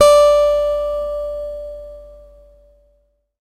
Sampling of my electro acoustic guitar Sherwood SH887 three octaves and five velocity levels
guitar, multisample